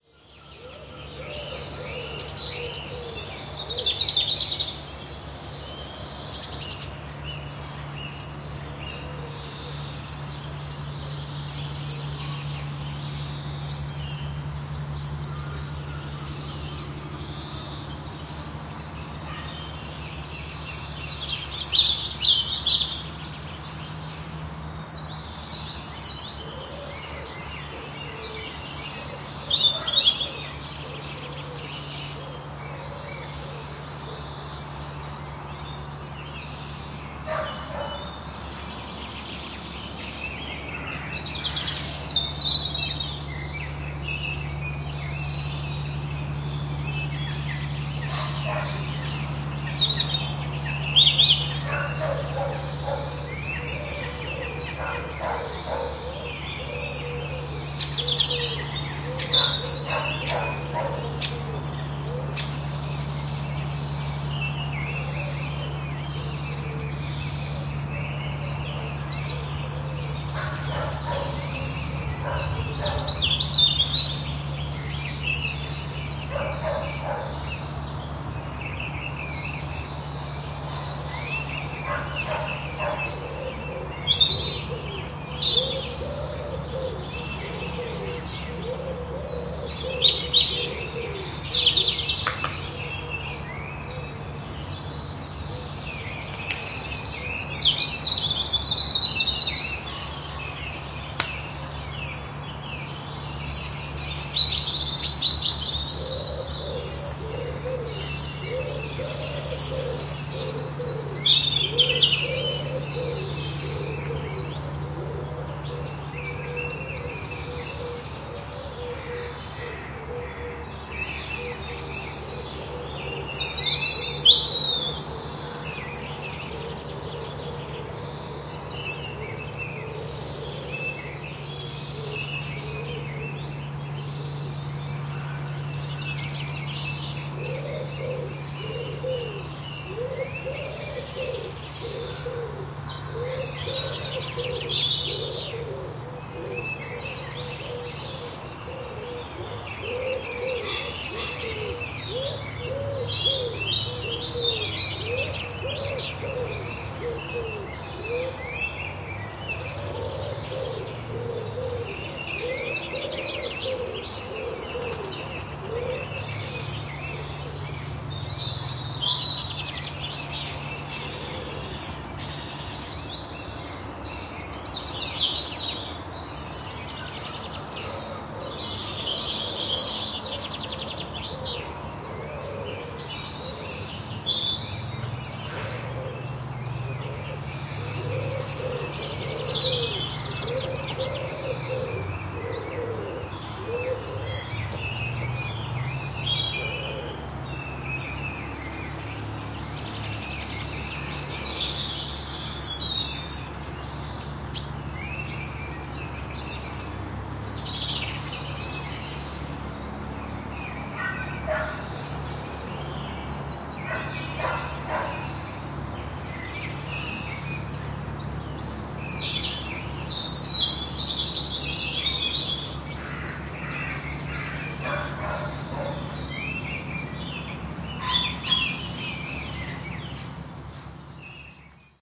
Kelburn 6pm Sunday,